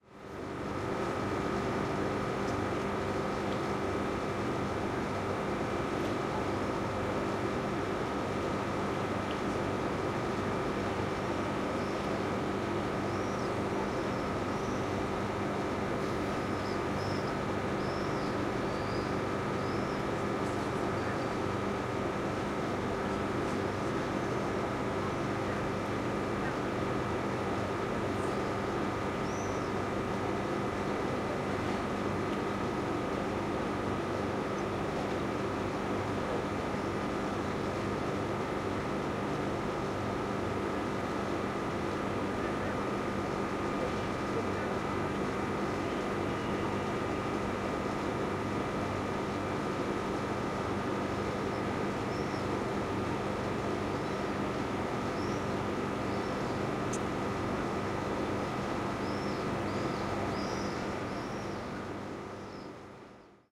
old grad fan dubrovnik 070516
07.05.2016: recorded between 17.00 and 19.00. On Walls of Dubrovnik (Old Grad) in Croatia. Noise of the rooftopfan. No processing (recorder martantz pmd620mkii + shure vp88).